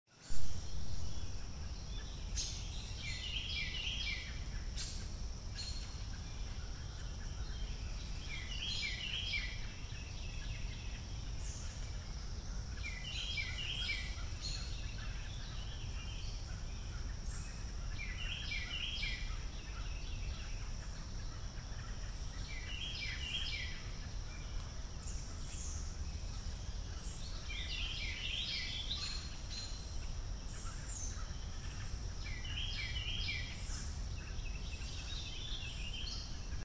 Palmyra Cove nature sounds july2021
Recorded in July 2021 in Palmyra Cove Nature Preserve, Palmyra, NJ, USA
field-recording, ambient, birds, ambiance